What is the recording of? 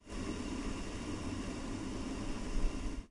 Brief sample of the sound of a kettle boiling.